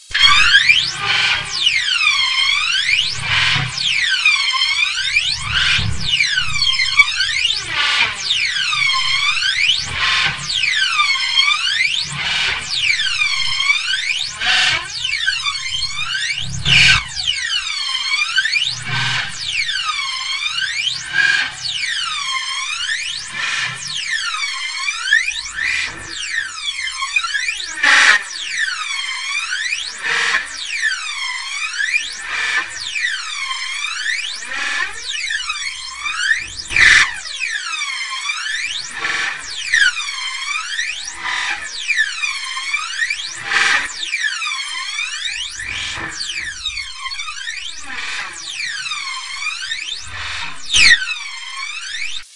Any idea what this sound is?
A alien starship moves slowly some hundred kilometers above Germany. We are exposed to some kind of powerful laser scanning. Some objects that happen to be where the laser pulse hits, they begin to fire, like trees, buildings and cars.
SPACE, alien, universe, sci-fi, starship, laser